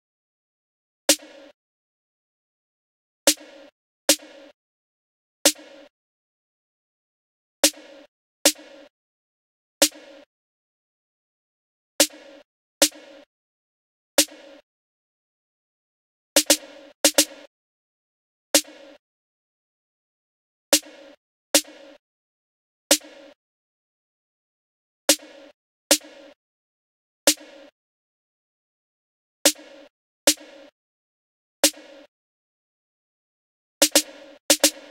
Trap Snare, 110 BPM
Drill, Snare, Trap